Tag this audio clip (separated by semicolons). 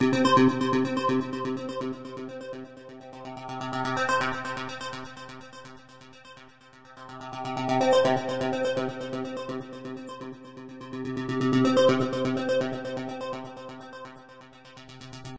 synth; techno; trance